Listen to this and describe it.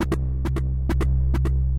hard club synth